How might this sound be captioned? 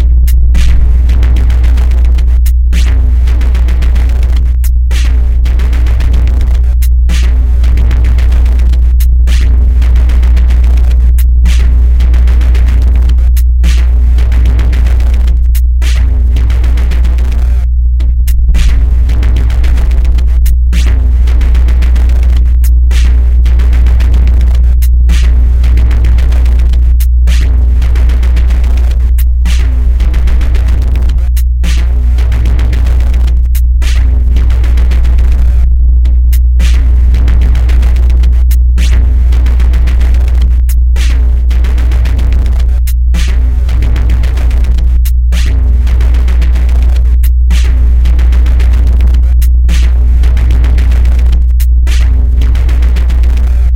industrial sfx, for use in a game, movie, etc
background, music